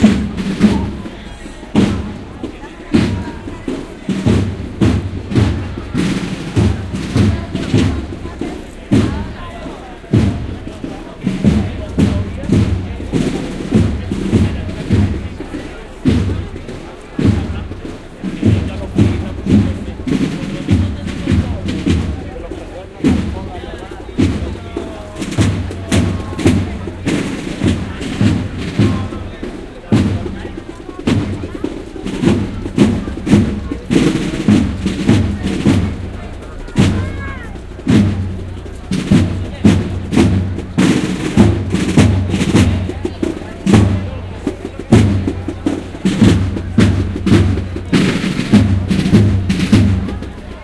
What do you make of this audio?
drums.parade
binaural recording of drums during a street parade. Voices. Recorded with in-ear Soundman OKM mikes. Processed with a hardlimiter at -0.5/+2dB / grabacion binaural de tambores en un desfile
band, binaural, field-recording, parade, sevilla, streetnoise